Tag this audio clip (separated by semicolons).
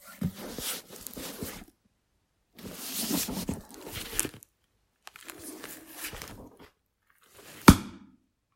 Book; book-grab; close-book; grabbing-book